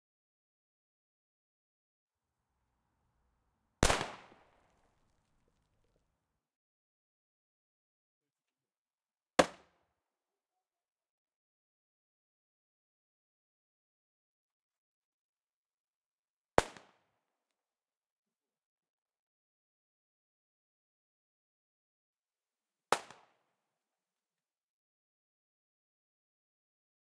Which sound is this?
Explosion of the banger.